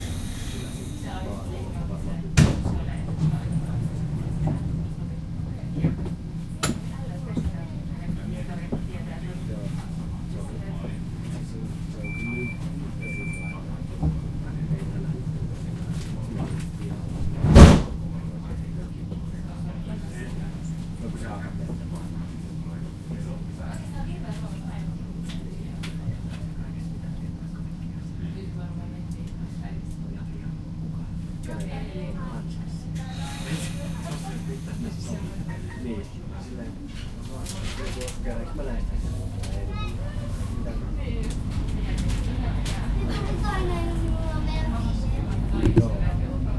train interior - sliding door - train starts. recorded with zoom h2n, location: Finland date: may 2015